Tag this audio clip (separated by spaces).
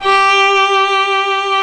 arco violin keman